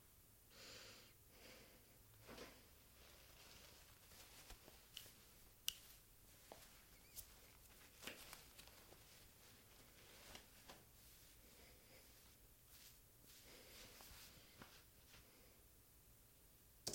Tying hair and ruffle
Me tying and ruffling hair